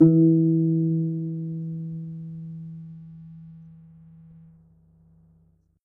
my mini guitar aria pepe
guitar, notes, nylon, string